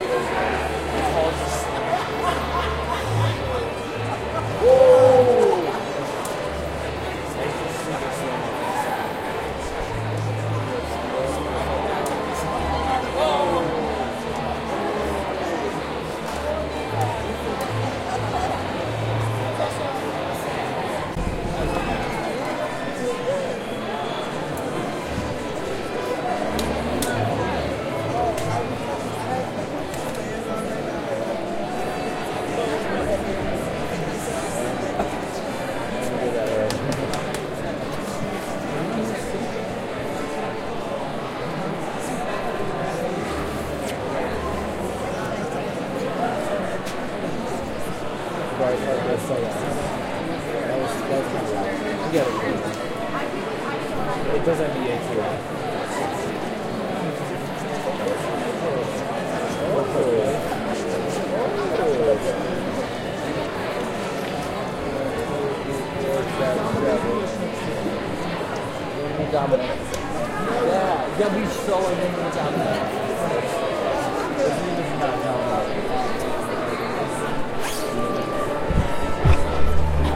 people waiting for symphony
Don Giovani as the orchestra warms up
auditorium, music, crowd, symphony, audience